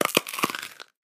Hard Candy / Bone Crunch
I needed a very specific brand of lollipop with a chocolatey center for a short film I'm working on. None of my library crunch FX sounded right, so I chomped into one myself. Just call me Mr. Owl.
Recorded on an iPhone, about an inch from my face. Just a single crunch edited to have a little extra tail. The effect came out as a satisfying crunch with decent low-mid range. Could be pitched down a bit to act as bones, or maybe a small to medium sized tree branch. I may record more of these after I visit the dentist next.